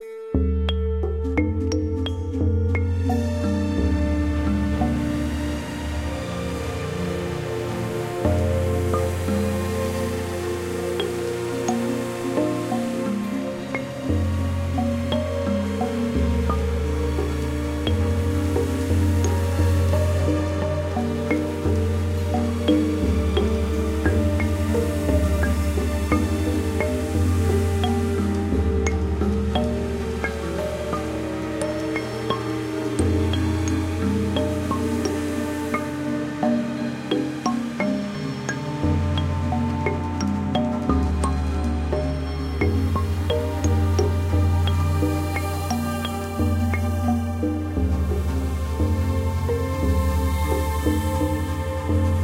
Waiting and Watching
Simple Music/Ambience fragment-germ of an idea for further development or use
Created in Central Victoria from home and on a train with LogicX with virtual instruments Omnisphere and Stylus (Spectrasonics)
music, uncertain, fragment, ambient, drone